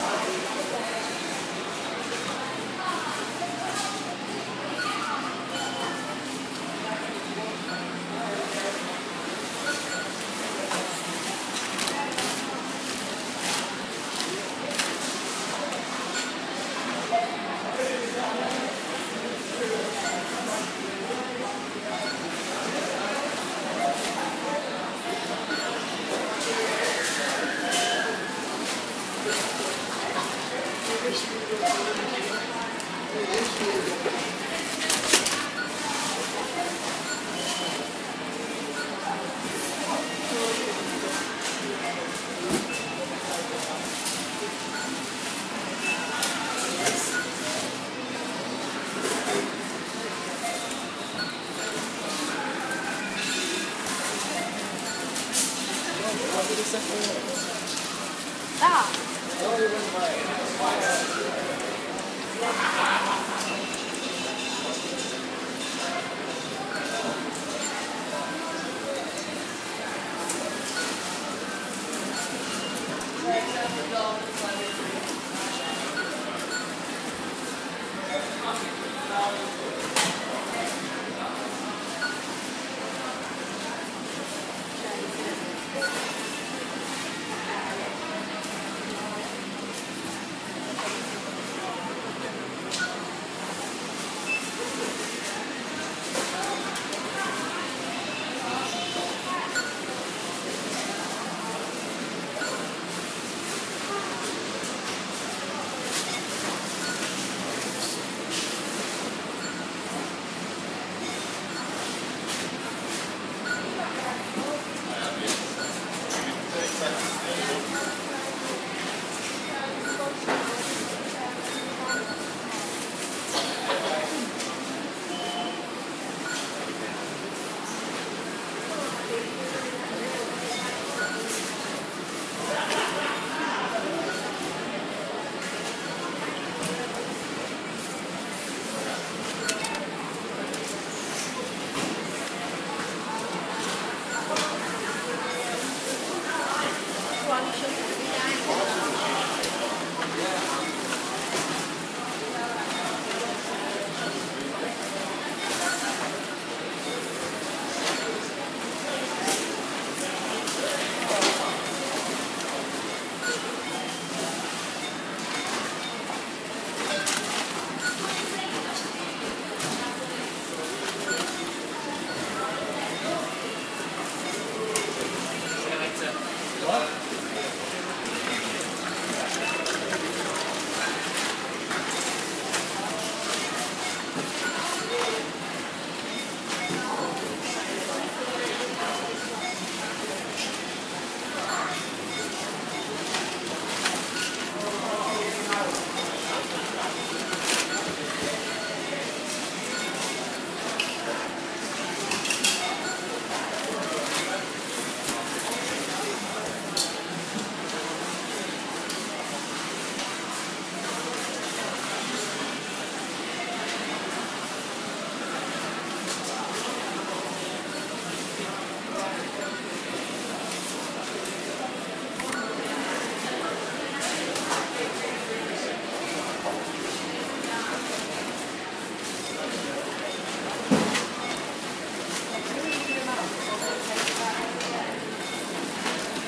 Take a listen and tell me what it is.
Checkouts at large superstore. Sound of bags being packed, scanners, those stupid self service checkouts and more. Recorded with a 5th-gen iPod touch. Edited with Audacity.